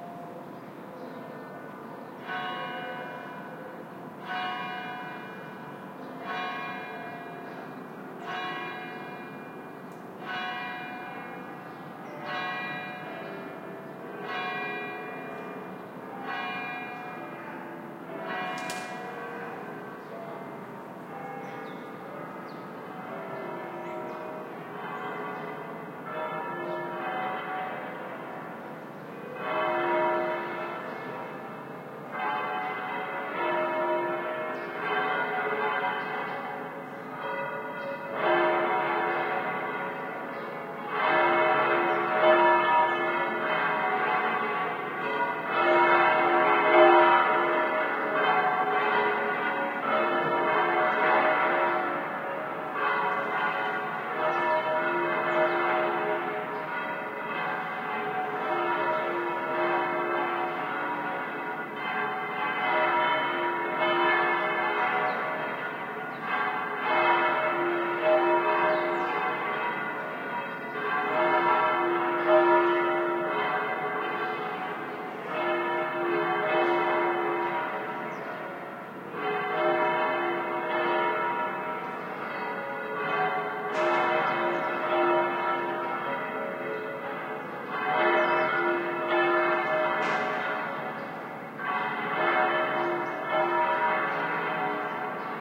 20071229.bells.fadein.16bit
Seville's cathedral bells fading in. The sound was recorded 1 km away from source as reflected by a high wall. Changes in volume are caused by changes in wind direction.
church-bells, pealing